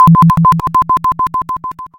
Satellite Signal.
If you enjoyed the sound, please STAR, COMMENT, SPREAD THE WORD!🗣 It really helps!
More content Otw!
/MATRIXXX